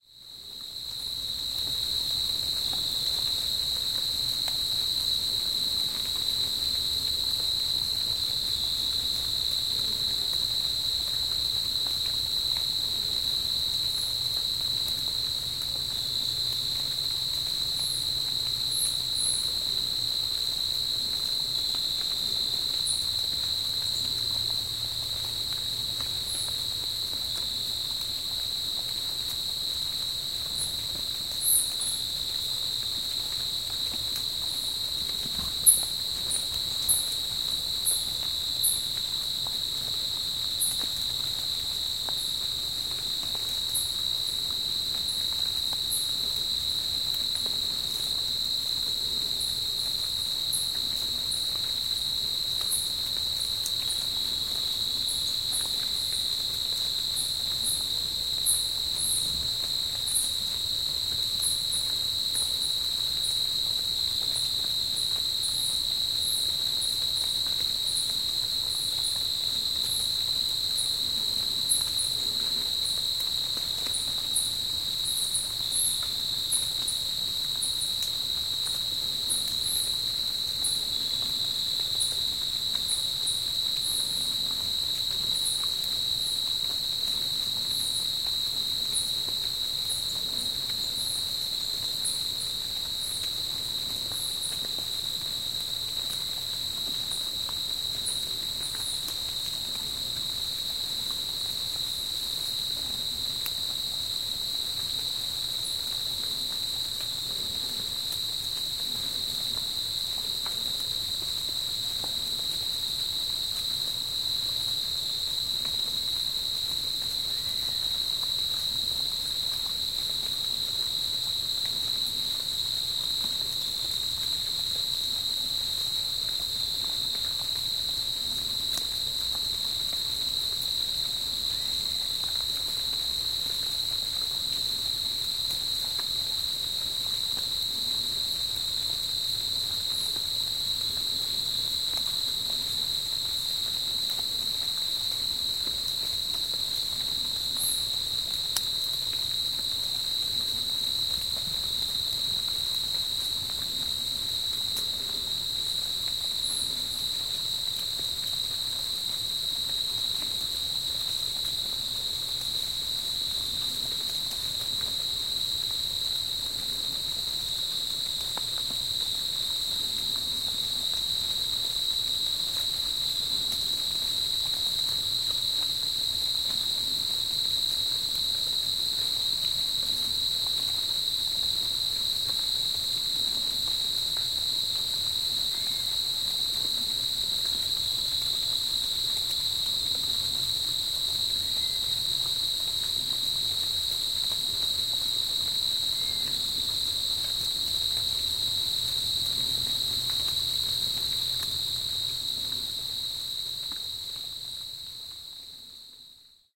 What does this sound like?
Rain drops and Crickets in the beautiful state of Veracruz Mexico April 3, 2013 Recorded with my Tascam DR-5
crickets-rain, drops, earth-life, meditation, planet